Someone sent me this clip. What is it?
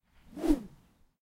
Bamboo Swing, C1
Raw audio of me swinging bamboo close to the recorder. I originally recorded these for use in a video game. The 'C' swings are much slower.
An example of how you might credit is by putting this in the description/credits:
The sound was recorded using a "H1 Zoom recorder" on 18th February 2017.
swing, whooshing